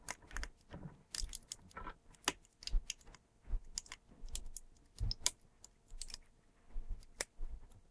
Crushing soda can 10
Me crushing a soda can with a seat clamp.
press, crinkle, smash, can, bench, crush, clamp, seat, soda